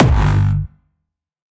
My newest Kick. This time its a "reversed bass" Kick even if the bass sound is made with a synth rather than a reversed kick.
There is a versin without reverb in this package too!